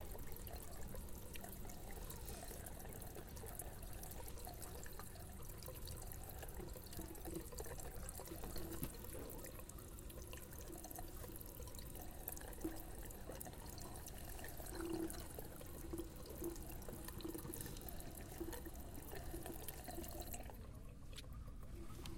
SonicSnap GPSUK Group9 water fountain
cityrings, sonicsnap